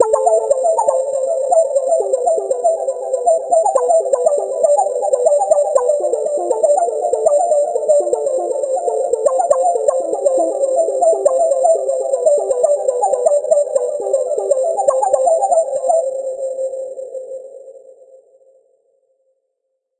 ARP C - var 2
ARPS C - I took a self created sound from Gladiator VSTi within Cubase 5, played some chords on a track and used the build in arpeggiator of Cubase 5 to create a nice arpeggio. Finally I did send the signal through several NI Reaktor effects to polish the sound even further. 8 bar loop with an added 9th and 10th bar for the tail at 4/4 120 BPM. Enjoy!
synth, melodic, arpeggio, 120bpm, harmonic